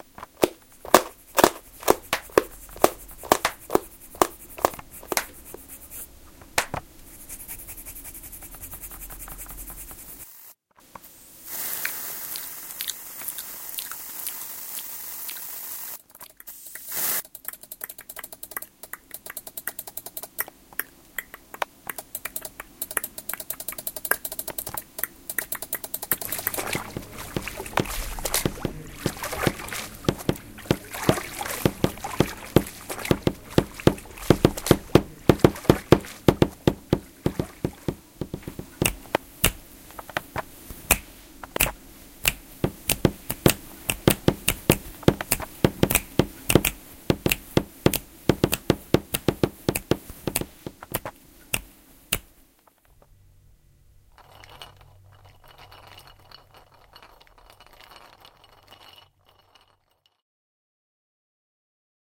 SonicPostcard WB Rabia
Here's the SonicPostcard from Rabia, all sounds recorded and composition made by Rabia from Wispelbergschool Ghent Belgium
belgium, cityrings, ghent, sonicpostcards, wispelberg